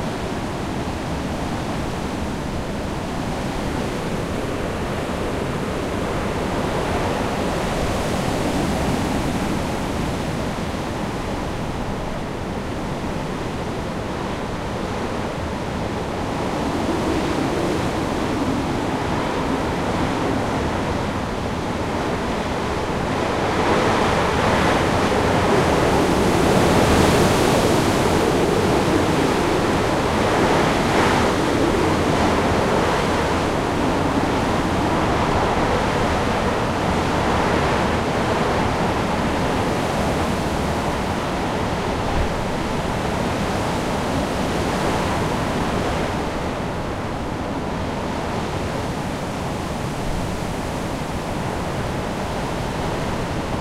Hurricane Ophelia - Youghal, Co. Cork, Ireland - 16th October 2017 (3)
Hurricane Ophelia - Youghal, Co. Cork, Ireland - 16th October 2017
wind, howling, blowing, Youghal, trees, blow, Hurricane, 2017, Cork, Ophelia, Ireland, windy, Co